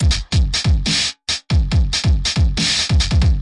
A dubstep loop amped with Guitar Rig.